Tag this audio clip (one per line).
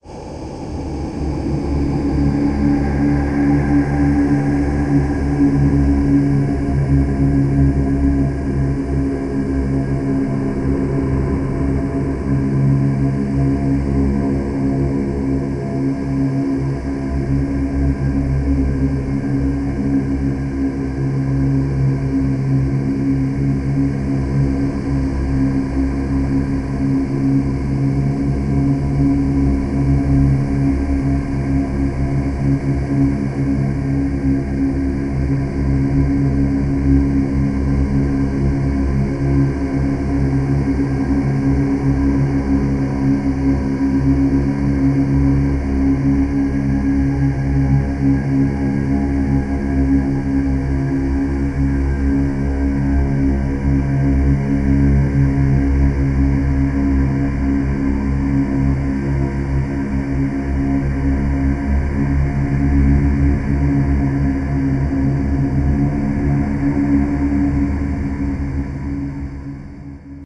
tibet
vocal
sing